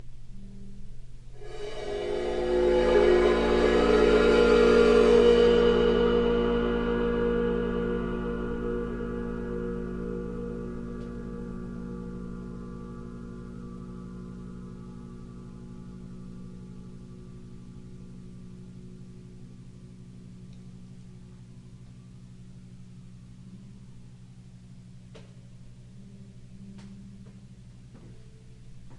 Cymbal Swell 101
bowed cymbal swells
Sabian 22" ride
clips are cut from track with no fade-in/out.